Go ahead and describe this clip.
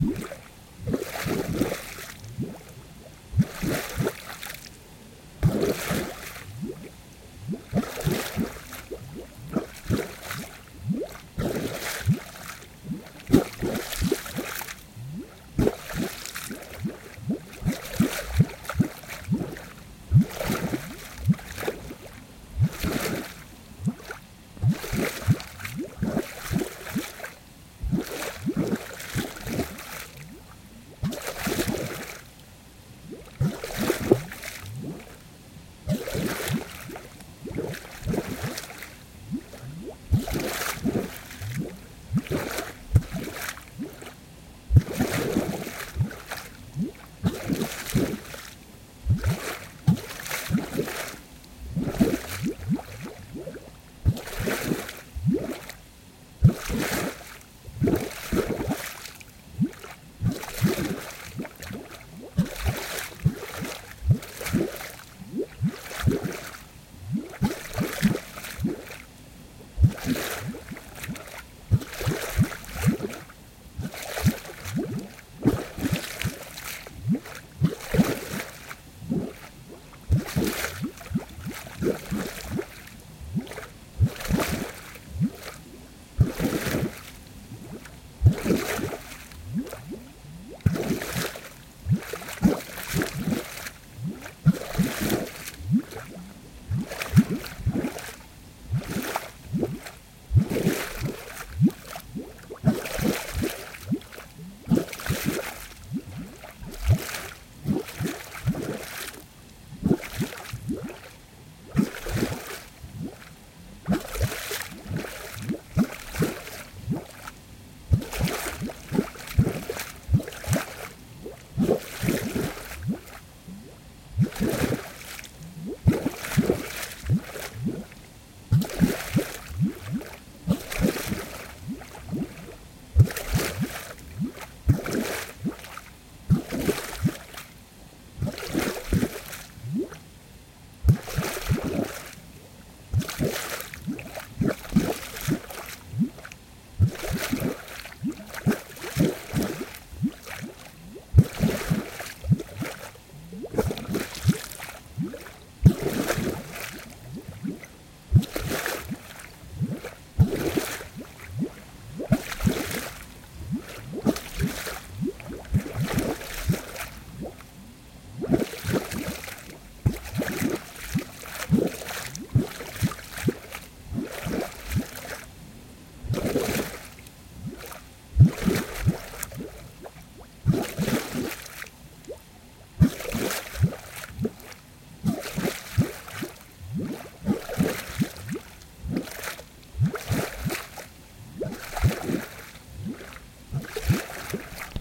Swamp Gas Bubbling
The sound of huge bubbles of air bubbling up from within the water, creating huge splashes.
Note it wasn't actually swamp-gas that caused this noise but some kind of drain-pipe leading into a small river near an elderly home... Almost the same though, right?